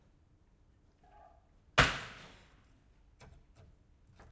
The house opposite of mine gets a new roof. The workers throw the old tiles down into a container in the street. Marantz PMD670 with AT825 recorded from some 5 metres away. Unprocessed.